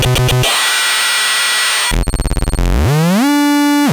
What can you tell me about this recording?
All sounds in this pack were made using a hand soldered synthesiser built in a workshop called DIRTY ELECTRONICS. The sounds are named as they are because there are 98 of them. They are all electronic, so sorry if "Budgie Flying Into The Sun" wasn't what you thought it was.
Make use of these sounds how you please, drop me message if you found any particularly useful and want to share what you created.
Enjoy.